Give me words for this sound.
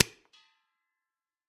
Hose - Coupler remove plung
Air hose coupler removed, it hits the gas bottle.
2beat, 80bpm, air, air-pressure, coupler, hose, metalwork, one-shot, tools